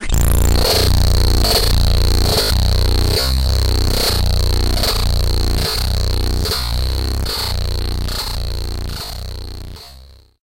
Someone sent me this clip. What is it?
This sample was generated in SFXR, then edited in Audition. I slowed the original track x8 and faded the last 3 seconds.